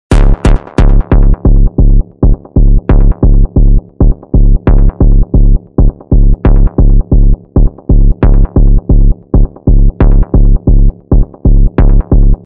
one more bassline